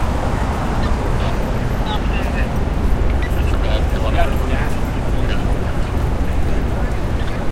City Sidewalk Noise with Police Radio
city, field-recording, new-york, nyc, police, public, radio, talkie, walkie